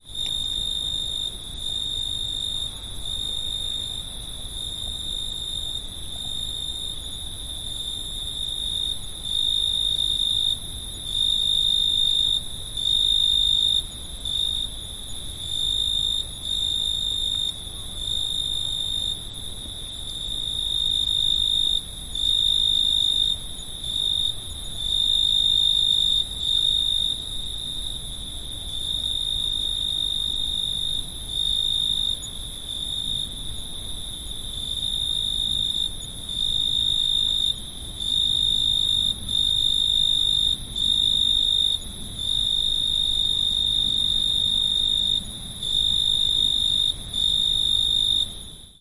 Grillen - viele Grillen, Nacht 1
Ambience with lots of crickets at night
Recorded at Vulcano, Italy
Version 1
ambience, cricket, field-recording, night